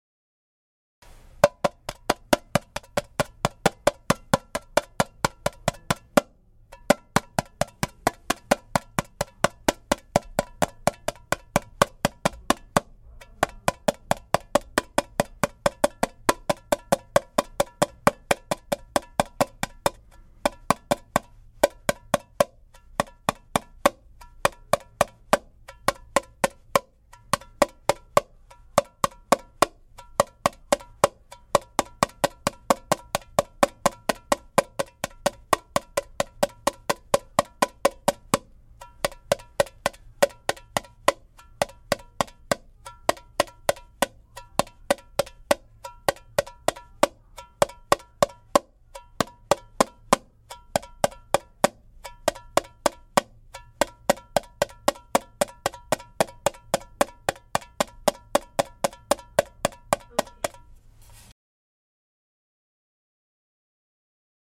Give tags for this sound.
samba brasil finger-picking bossa-nova music brazil instrument brazilian tamborim

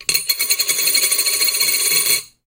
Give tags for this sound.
money cash bank coins

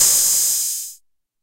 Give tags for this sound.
oh roland hat hihat accent open hi tr

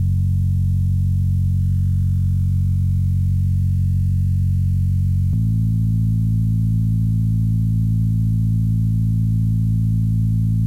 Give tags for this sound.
sub bass bassline sub-bass

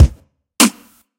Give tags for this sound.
beatbox hip hiphop hop kick kit loop percussion rap sample snare

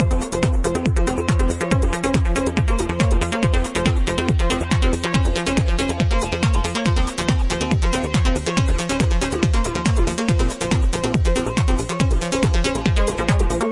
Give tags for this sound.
loop techno

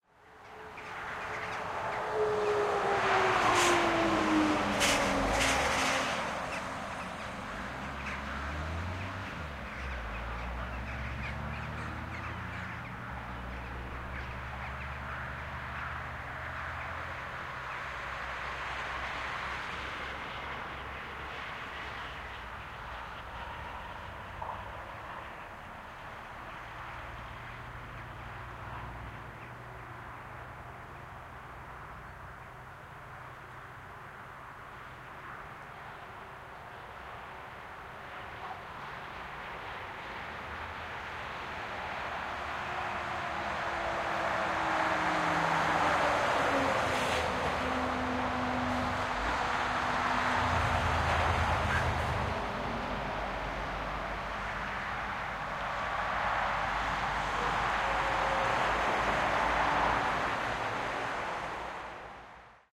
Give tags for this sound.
road lubusz swiebodzin bird nature poland fieldrecording

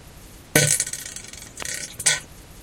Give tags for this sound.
noise weird fart frog computer ship snore beat explosion gas flatulation laser poot frogs race aliens flatulence space car nascar

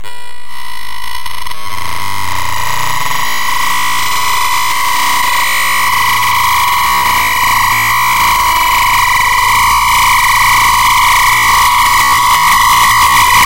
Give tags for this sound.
8 bit retro

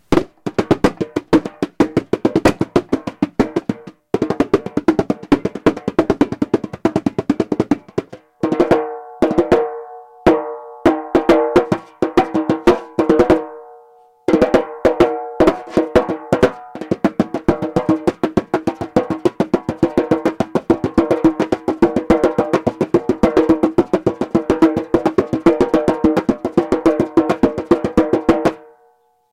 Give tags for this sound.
drum drummed drumming drums finger fingernails fingers nails rhythm rhytmn snare snaredrum

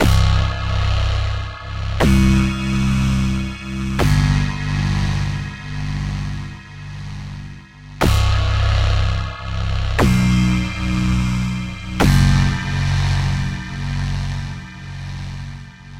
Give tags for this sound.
bass electronic

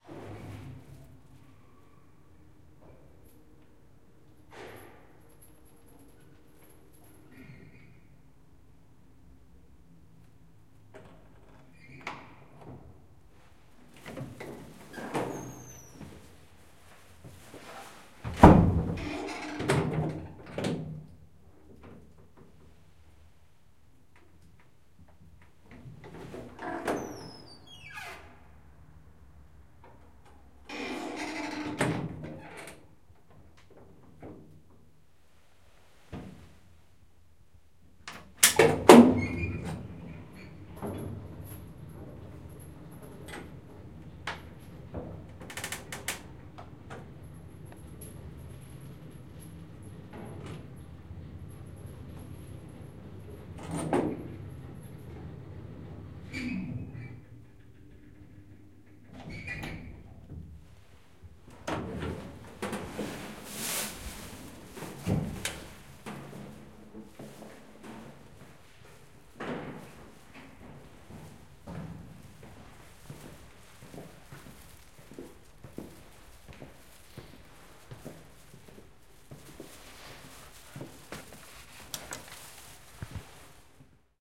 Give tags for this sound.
elevator lift up

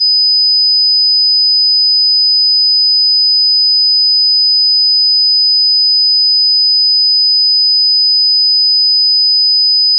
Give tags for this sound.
hearing-test sine-wave tone

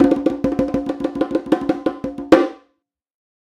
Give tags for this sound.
percussion bongos ethnic drums roll bongo

